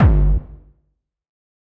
Clean Hardcore Kick (One-shot)
I layered this Hardcore kick putting more emphasis on the clarity of the kick and less on distortion.
Distorted, oneshot, Hardstyle, shot, One, Distortion, one-shot, Schranz, Hardcore, Kick, Techno, Bassdrum